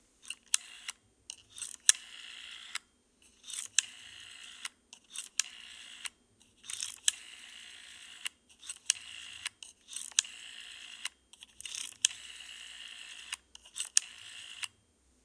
Turning an old telephone dialing disc several times and various numbers. Low frequences filtered to get rid of disturbing street noise. Vivanco EM35, Marantz PMD671.